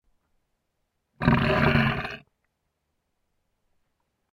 A burp put through a lexicon reverb processor to create monster burp/grunt.
burb, cave, gruntle, reverb